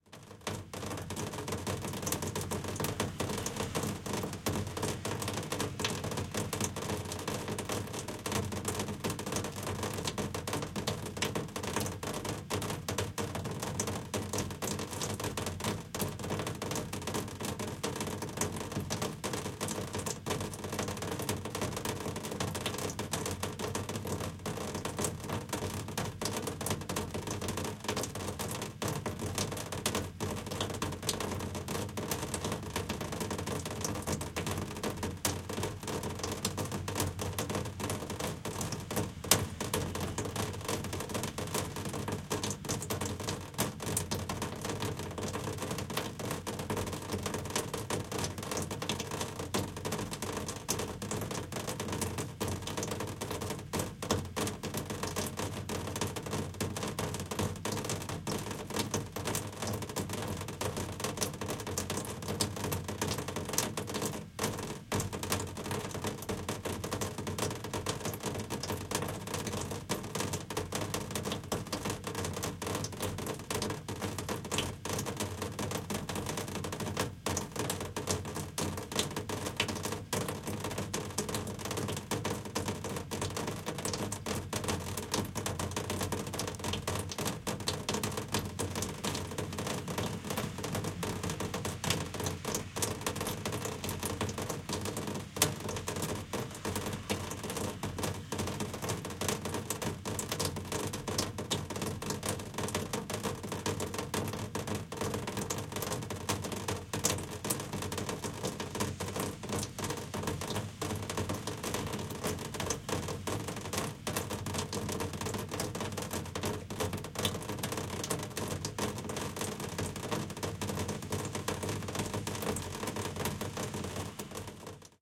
2 of 5. Close mic'd raindrops on a window air conditioner. Narrow stereo image. Some distant street noise. Try layering all five or panning them to surround channels.